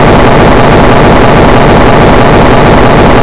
1stPack=NG#14
Static sounds. }loopable{